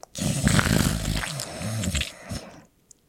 Zombie eating flesh
Inhuman creature zombie-like gasps. Zombie voices acted and recorded by me. Using Yamaha pocketrak W24.
brute, beast, flesh, horror, male, bark, breath, inhuman, zombie, moan, gasps, undead, snort